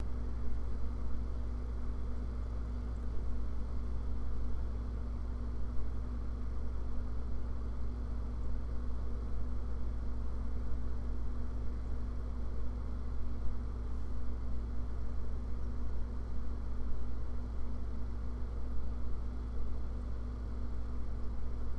Appliance - refrigerator running
The sound of a refrigerator fan and compressor running.
refrigerator, appliance, motor, kitchen, running, compressor